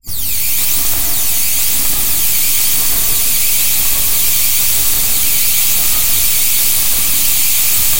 2nd Spaceship
Spaceship created for music-152
music-152, sci-fi, science-fiction, sfx, spaceship